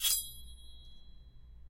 Sword slide 2
metal, slide, sword